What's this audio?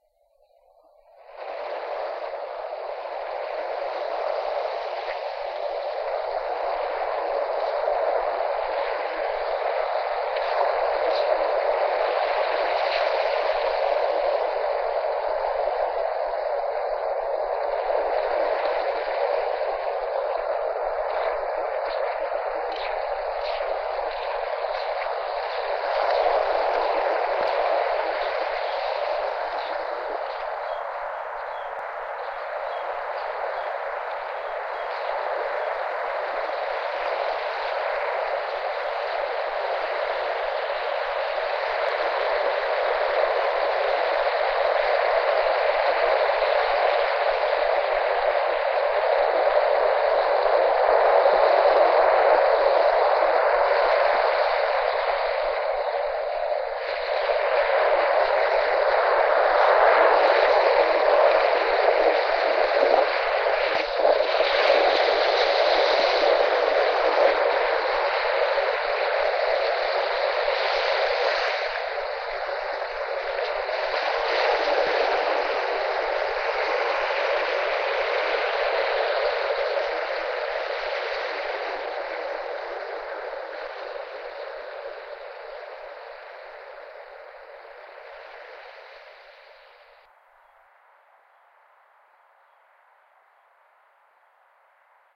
lofi beach
ocean, walking, waves, birds, florida, sand, nature, beach, water, seagulls